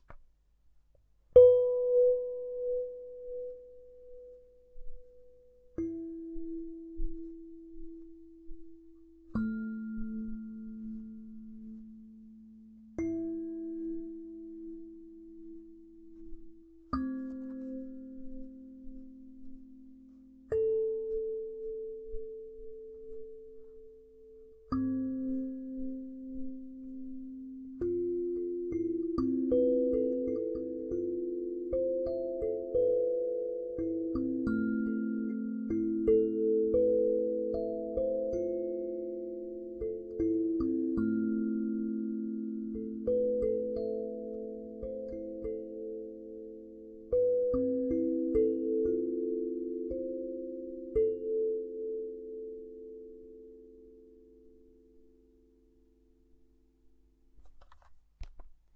A Sansula (similar to kalimba) recorded with a zoom
instrument, mystic, sansula